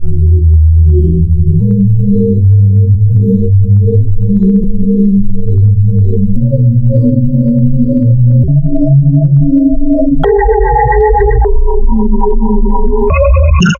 Like with druid16, i've createdrwa sound by close to mouth and then following scheme of editing programs, such as amplifikation, doppler, flanger, trimolo and finally selection of best part. Thus, I created the sound of foton Engine passing several levels of energy to obtain Warp7.
engine, foton, spaceship